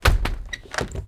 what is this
squeaky door handle close
close, closing, door, handle, squeaky